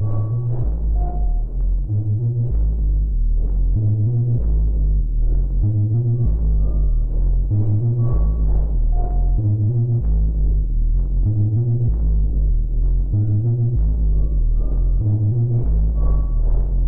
a low frequency suspended atmosphere
atmosphere, texture, suspense, electro, drone, ambient, tense, illbient, ambience, sci-fi, experiment, soundtrack, backgroung, weird, pad, strange, soundscape, suspence, film, score